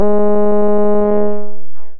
Basic impulse wave 3 G#3
This sample is part of the "Basic impulse wave 3" sample pack. It is a
multisample to import into your favourite sampler. It is a basic
impulse waveform with some strange aliasing effects in the higher
frequencies. There is a low pass filter on the sound, so there is not
much high frequency content. In the sample pack there are 16 samples
evenly spread across 5 octaves (C1 till C6). The note in the sample
name (C, E or G#) does indicate the pitch of the sound. The sound was
created with a Theremin emulation ensemble from the user library of Reaktor. After that normalising and fades were applied within Cubase SX.
basic-waveform, impulse, multisample, reaktor